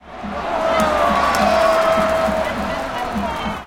nagoya-baseballregion 17
Nagoya Dome 14.07.2013, baseball match Dragons vs Giants. Recorded with internal mics of a Sony PCM-M10
Ambient, Baseball, Crowd, Soundscape